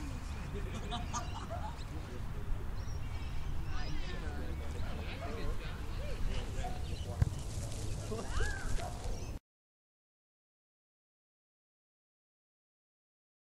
Recorded on an MP3 player using the voice recorder. Recorded at the Concord RSL Women's Bowling Club on a Sunday. Sound of bowl rolling over the green as it nears the jack.
english, field, grass, bowls, sport, recording, quiet, lawn, ambient, australia